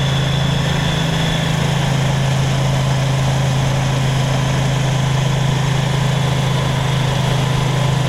motorcycle dirt bike motocross onboard riding medium speed stereo